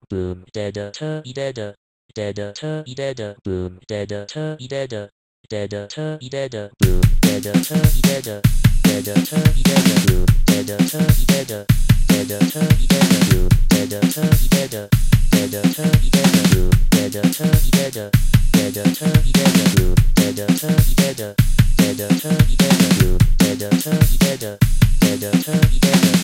Robot Singing
loop, robot, robotics, ringing, machine, music